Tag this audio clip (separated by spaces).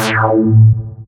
Sound Synth synthetic